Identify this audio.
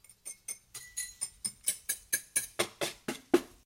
Hitting glass objects